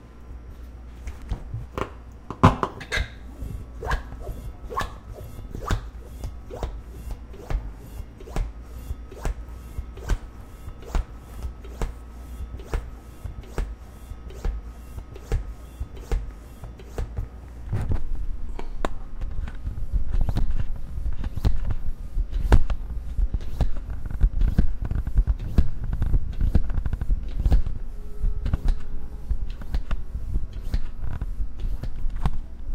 small pump basketball ball